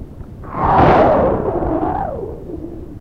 A mouth sound recorded on portable cassette deck with Fast Forward activated.